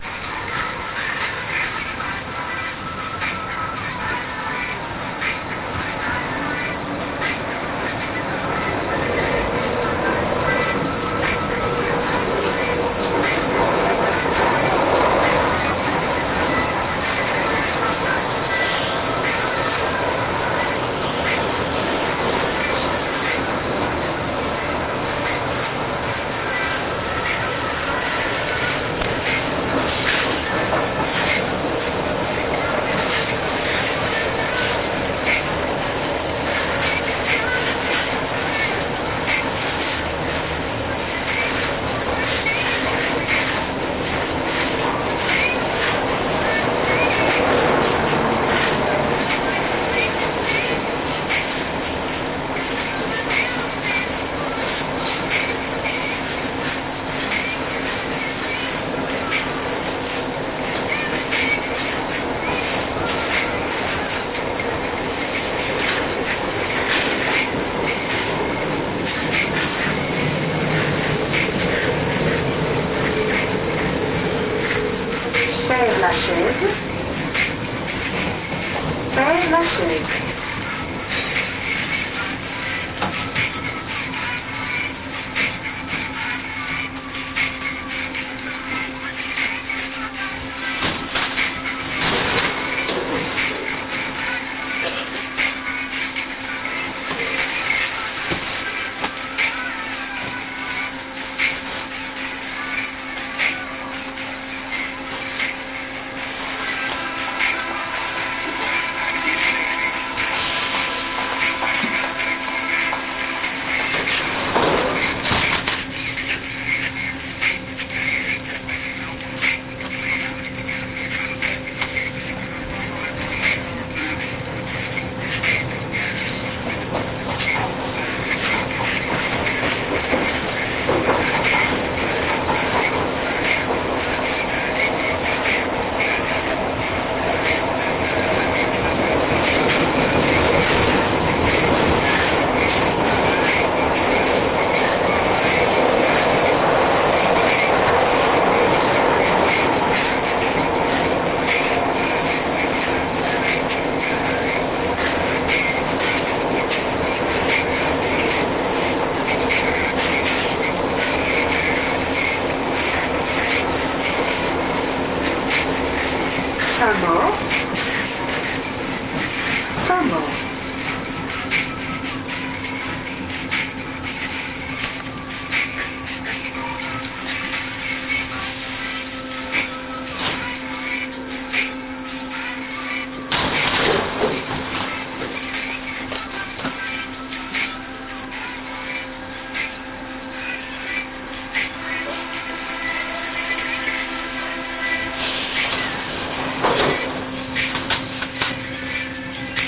metro rnb-perelachaise-saint-maur
A short travel between subway stations Père-Lachaise and Saint-Maur in music.